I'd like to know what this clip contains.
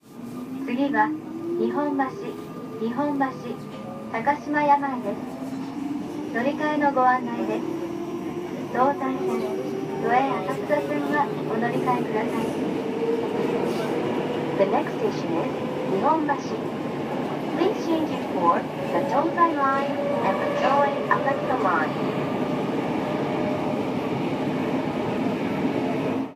Announcement train accelerating Tokyo japan next station Nihombashi edlarez vsnr
PA Announcement, train accelerating sound recorded inside the wagon, departing Train next station Nihombashi Tokyo japan edlarez vsnr.
announcement, depart, departing-train, japan, next-station, nijonbashi, pa, station, Subway, tokyo, train